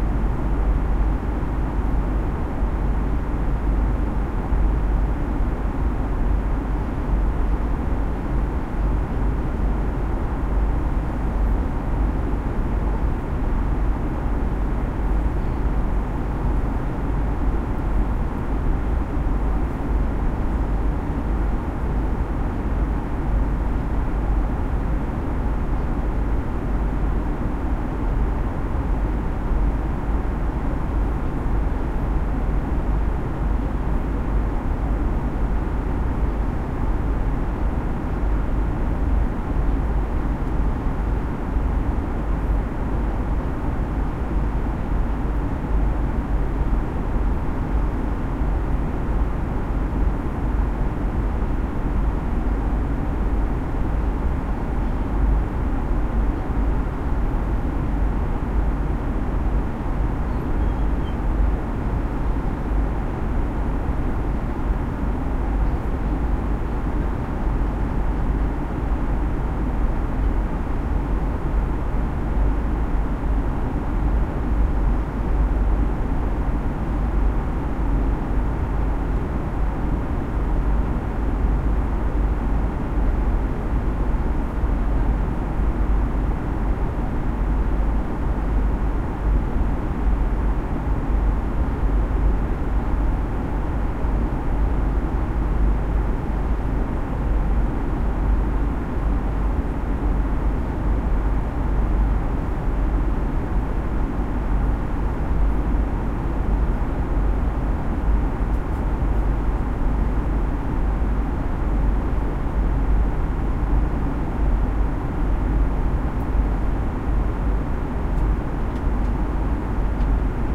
AUH Inflight ambience HPOFF
Etihad Airways - BOEING 777-300ER Business Class Cabin - In-flight ambience 2 minutes duration during a quiet time.
Stereo recording. Edirol R09HR with Sound Professionals Binaural mics positioned on blanket over lap.